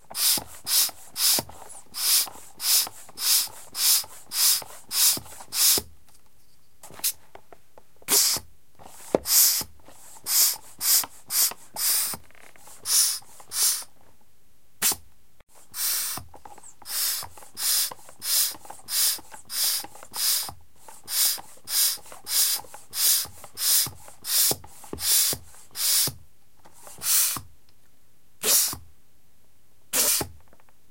fietspomp studio
studio-recording of pumping air with a bicyclepump